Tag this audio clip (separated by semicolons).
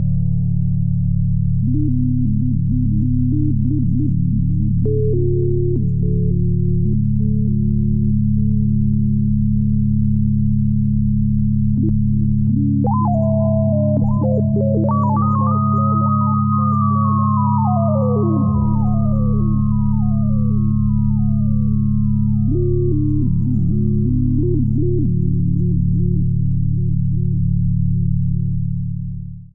ambient; electro-acoustic; Sancristoforo; Berna; time-stretched; electronic